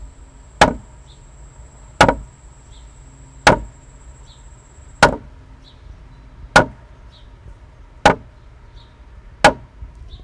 Slow hammering of a metal hammer on wood surface, outside ambiance in the background.
hammer, metal, slow, wood